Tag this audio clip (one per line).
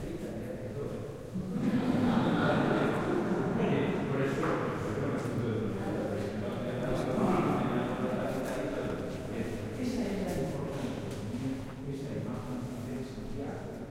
church
echo
field-recording
Spain
ourense
spanish
voices